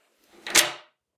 Bolt Lock 6
deadbolt / lock being used
Bolt, chest, key, Lock, Metal, turn, Unlock